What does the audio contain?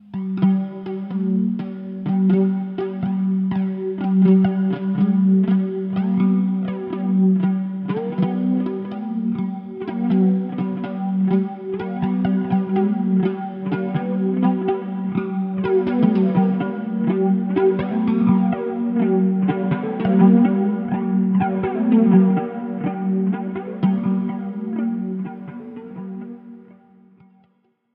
tapehead dulcimer
This is a short loop I made with a Cassette tape head as a pickup on a single guitar string across a plank of wood and played with a plastic fishing discorger like a Hammered Dulcimer. Fed through my Nux MFX-10 effects pedal into a Boss Loopstation and then recorded into Reaper.